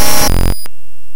Glitchy Data (Infected)
Made by importing raw data into Audacity
audacity, data, distortion, file, glitches, infect, infection, raw